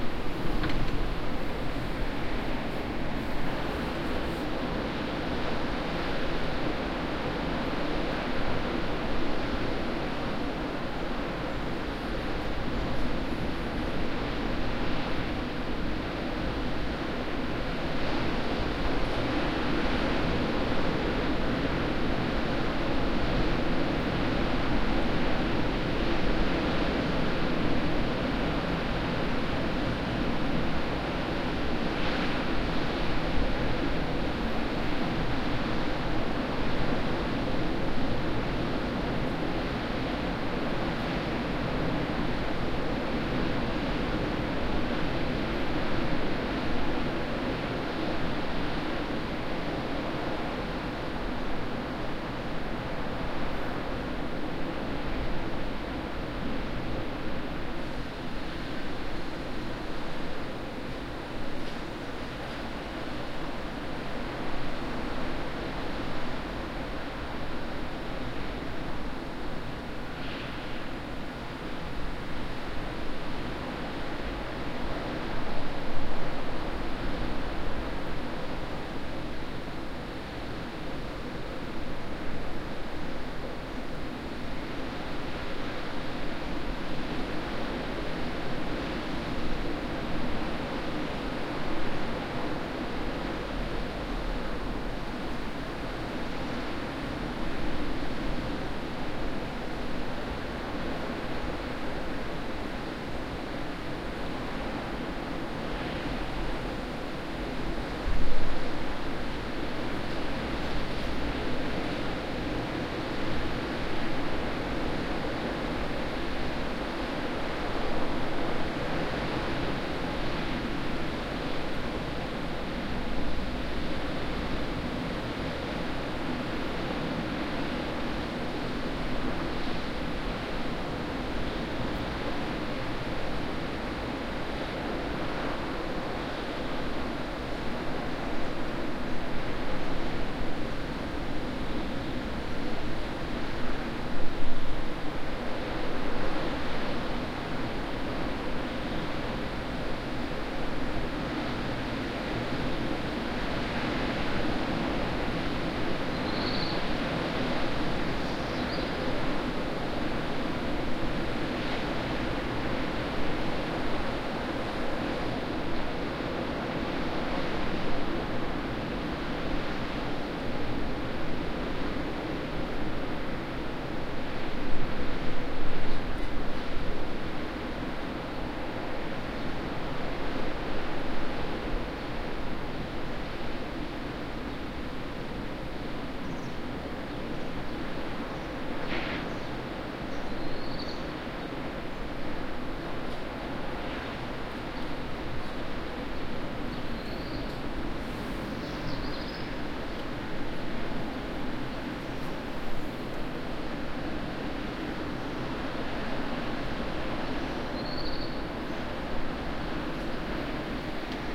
porto 22-05-14 10am sea recorded from a distance
Breaking waves recorded from a 100m distance. Some engines and birds can be heard
atlantic, beach, binaural, field-recording, ocean, rock, sand, sea, sea-side, spring, storm, surf, tide, water, wave, waves, wind